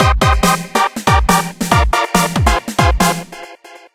Glider 2 Flicker
softsynth sequence with delay and drums. "150 bpm"